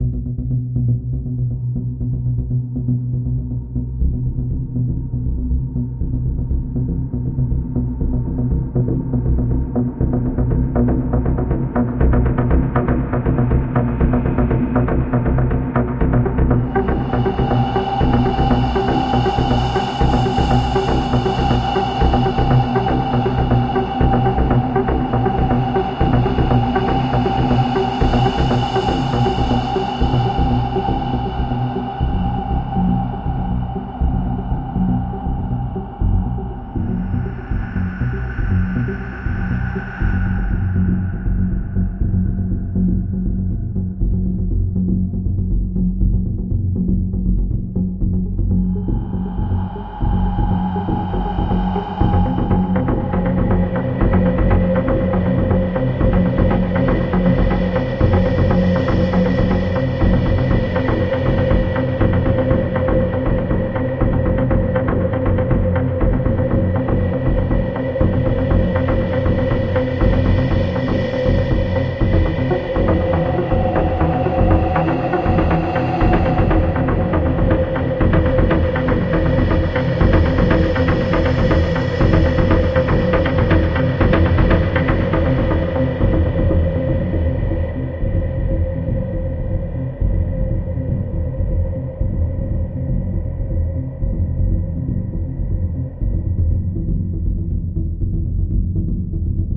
Dark Synth Drone Action Mood Atmo Cinematic Film Music